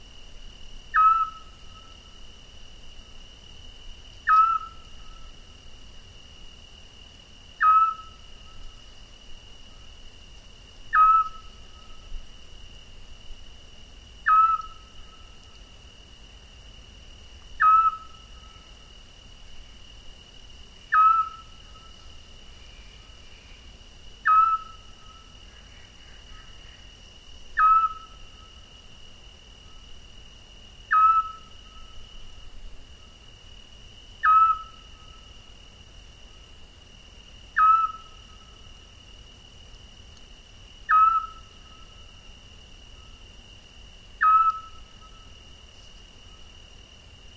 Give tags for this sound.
bird-calls birdsong owls owls-in-spain scops scops-owl

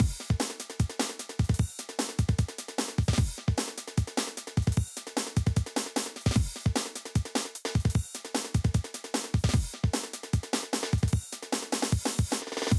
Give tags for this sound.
beat braindance drum-loop electronica free idm

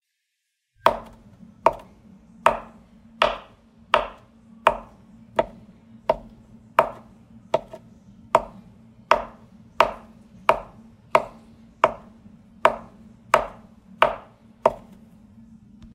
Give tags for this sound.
cooking kitchen music152

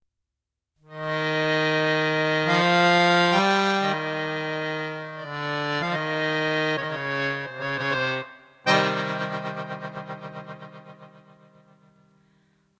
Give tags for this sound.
Accordion ethnic intro

